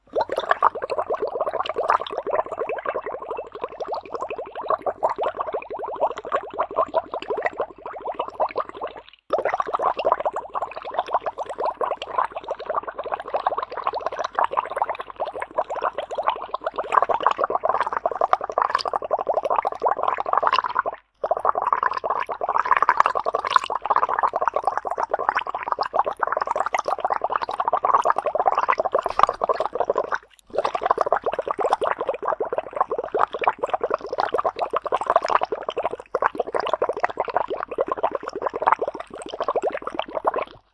bubbles thru straw
thru, bubbles